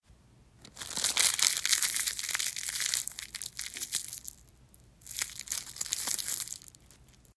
Crinkling up a foil wrapper